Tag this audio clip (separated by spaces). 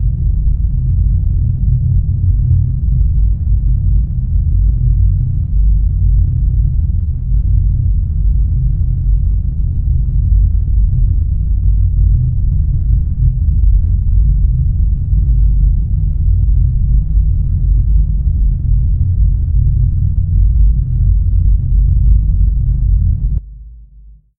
noise rumble shake